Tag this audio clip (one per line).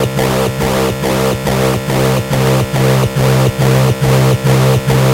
140
crunchy
deep
sub